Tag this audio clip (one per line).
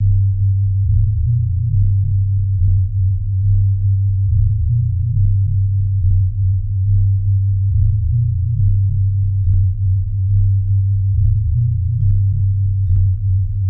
bass
dance
synth
processed
loop
electronica